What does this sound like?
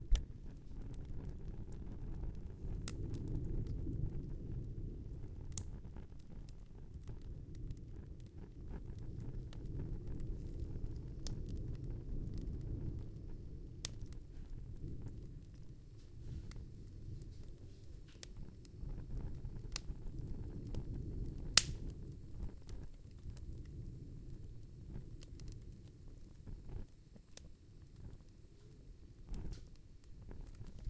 Fire Crackle with Roaring Chimney
A gentle log fire in a living room. Slight roar from chimney.